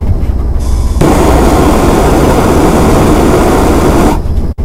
recording of a propane torch.
it puts out some propane, lights, and shuts off